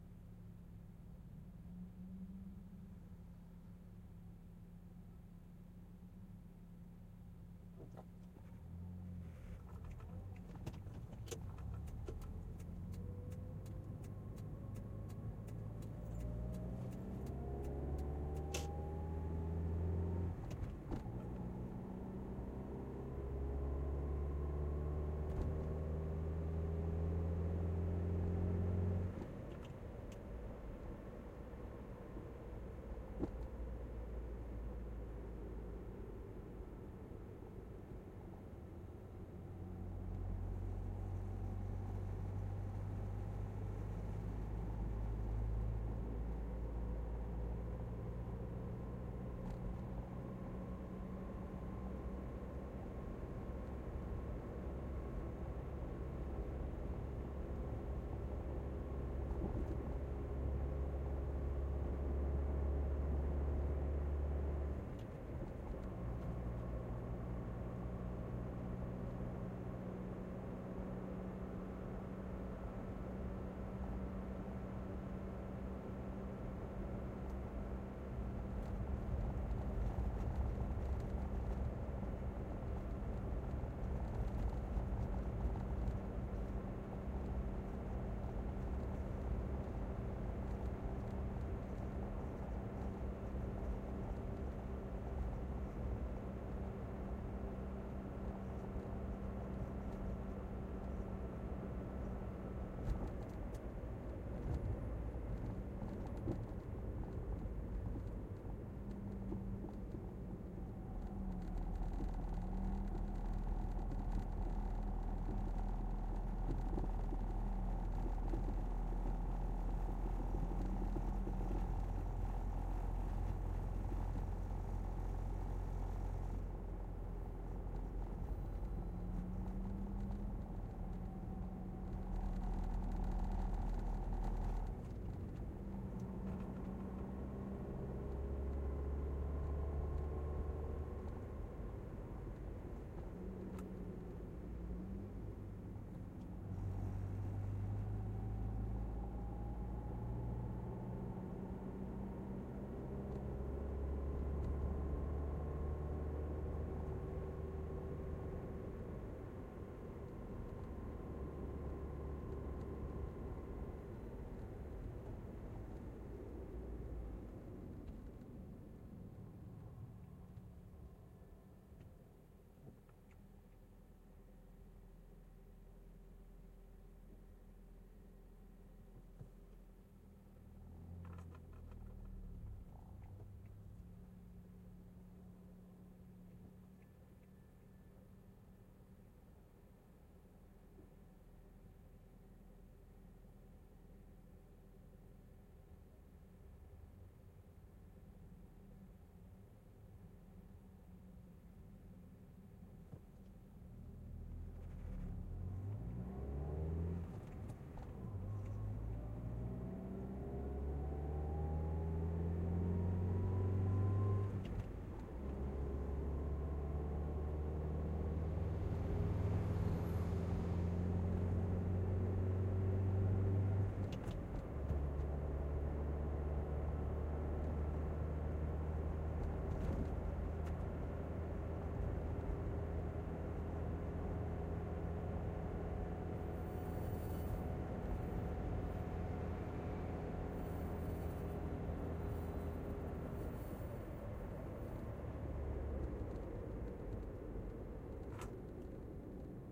Small Passenger Car Sequence
Part 2 - Steady Driving, traffic pass-by's etc windows up -gear shifts -indicators -handbrake etc, recorded on the interior of a Toyota Conquest Driving in Johannesburg, South Africa on a Tascam DR-07.